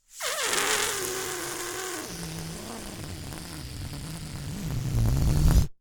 Balloon Deflate Short 4
Recorded as part of a collection of sounds created by manipulating a balloon.
Balloon, Short, Deflate, Fart, Flap